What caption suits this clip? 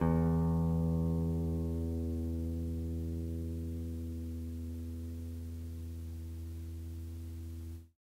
Tape El Guitar 1
Lo-fi tape samples at your disposal.
lofi, tape, collab-2, vintage, lo-fi, Jordan-Mills, guitar